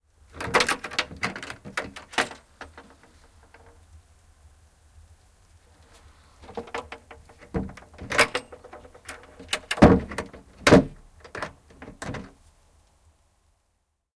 Ikkuna auki ja kiinni, mökki / Window, open and close, wooden cottage, hook clatters, a close sound
Puisen mökin ikkuna avataan ja suljetaan. Haka kolisee. Lähiääni.
Paikka/Place: Suomi / Finland / Enontekiö
Aika/Date: 04.05 1980
Clasp, Cottage, Field-Recording, Finland, Finnish-Broadcasting-Company, Hook, Ikkuna, Puinen, Puu, Soundfx, Suomi, Tehosteet, Window, Wooden, Yle, Yleisradio